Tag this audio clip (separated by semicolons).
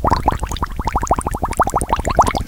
bubbles; water